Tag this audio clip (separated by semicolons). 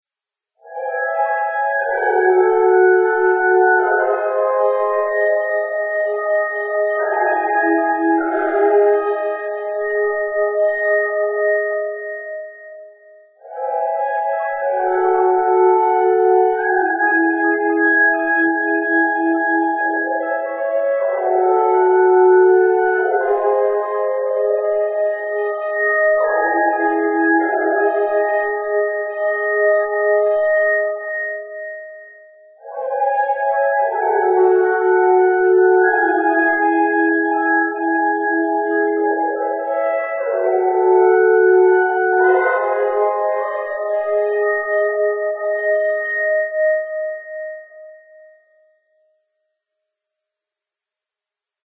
abox; choral; female; music; synthetic; voices